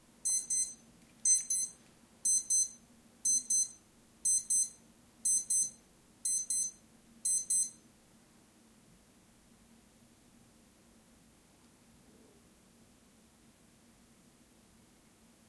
20070504.ShureFP24.EdirolR09
beep alarms from my Sanyo watch, and 'silence' as heard by Sennheiser ME66 (left) and Sennheiser MKH60 (right channel). Both mics were plugged into a Shure FP24 preamp, with gain knobs set at 3/4 of maximum. Recording done in a Edirol R09 set at a recording level (8) wich - according to most folks - does not add anything to the preamp output (may serve as a reference, since that's the combination I often use to record in nature). Worth noting the different sound of the K6 mic vs the MKH, which has flatter response and less self-noise.
alarm, beep, gear, mic-comparison, pream-test, silence, watch